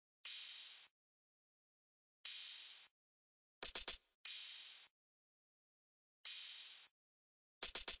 minimal drumloop just hihats
acid, beats, club, dance, drop, drumloops, dub-step, electro, electronic, glitch-hop, house, loop, minimal, rave, techno, trance